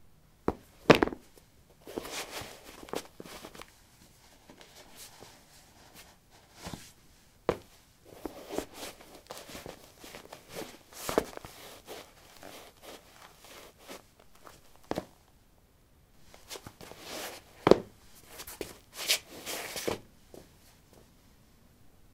lino 13d sportshoes onoff
Putting sport shoes on/off on linoleum. Recorded with a ZOOM H2 in a basement of a house, normalized with Audacity.
footsteps, steps